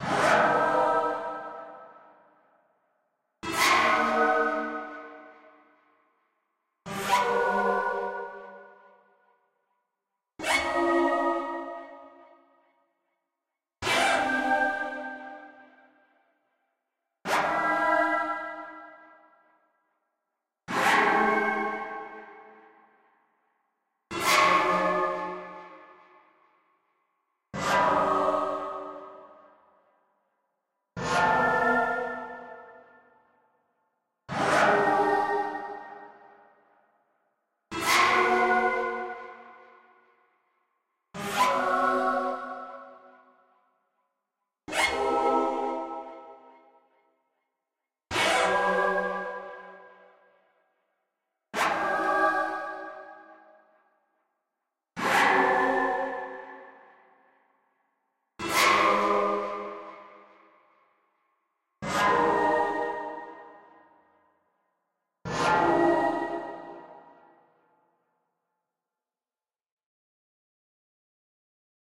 Small poofs of flux
A sound when some magics goes totally wrong and down the drain in a gigantic epic failure. The consequence is that bad things starts to appear in the world like taint or flux. Those who've been playing FTB knows what I'm talking about.